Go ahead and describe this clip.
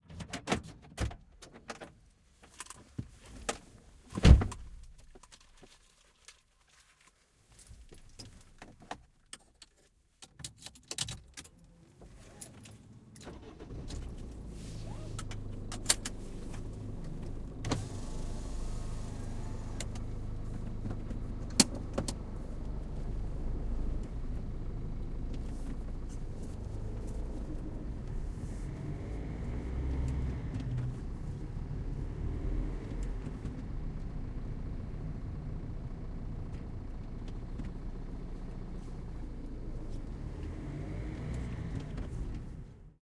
Car door open, key, engine
automobile, car, close, closing, door, drive, engine, key, motor, open, opening, vehicle